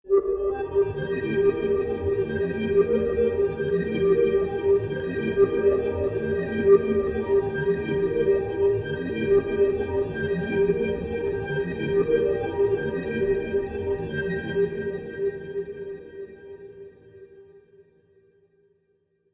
Ambient Space 4
A sample of background music for space ambiance, or possible a sci-fi intro.
If you make anything, please share a link. I'd love to hear what you all make:)
Alien, Ambiance, ambient, Artificial, background, electro, electronic, helm, loop, music, space, strange, Synthetic, techno